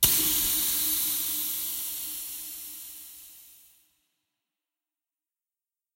This sound was made from a shaving cream can that was running out of shaving cream. It was edited to sound like an air pressure release sound. Use it as a hydraulic sound or whatever you want. Credit would be nice but is not required.